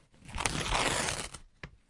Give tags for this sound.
binaural paper sony-pcm-d50 tear

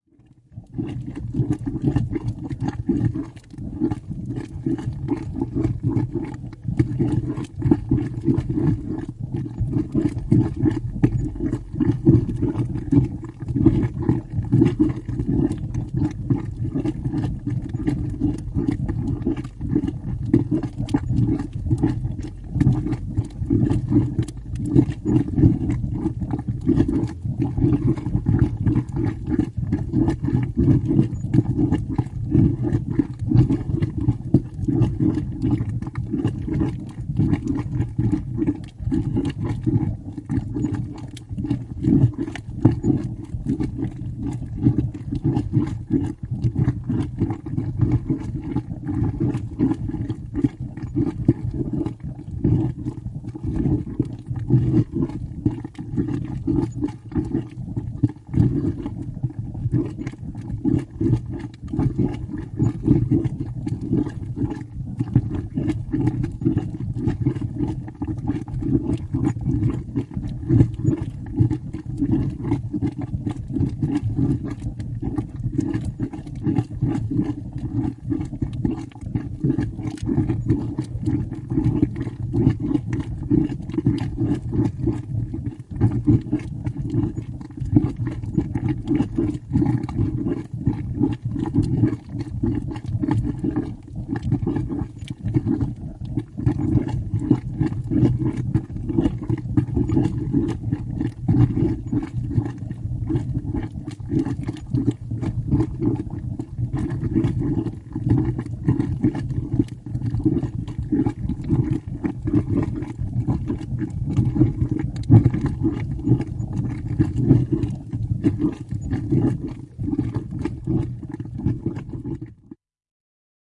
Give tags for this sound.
Boil Bubble Clay Field-Recording Finnish-Broadcasting-Company Geoterminen Iceland Islanti Kiehua Kuplat Kuplia Mud Muta Pool Pulputtaa Pulputus Savi Soundfx Tehosteet Yle Yleisradio

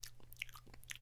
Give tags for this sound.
davood wet

